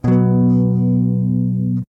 Jackson Dominion guitar run through a POD XT Live Mid- Pick-up. Random chord strum. Clean channel/ Bypass Effects.